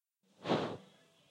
dhunhero bigwoosh2
This was pretty hard to do and didn't give the result I expected.
I didn't blow into the microphone, and rather, I just "fanned" the microphone several times for Audacity to recognize the "wooshing" sound.
big, hand, woosh